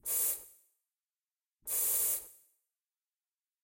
Deoderant, Graffiti spraycan etc..
Enjoy!